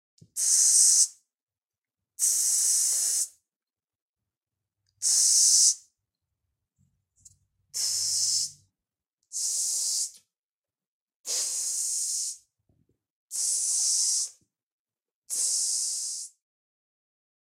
spray, action, spraycan